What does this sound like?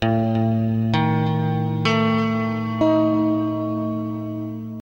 AD#AD#
Ibanez guitar processed with Korg AX30G multieffect ('clean'), fingerpicked
musical-instruments, electric-guitar